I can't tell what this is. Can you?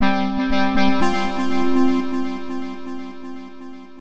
A short little fanfare, of sorts. Can be used for a level-up sound or notification. Made using AudioSauna.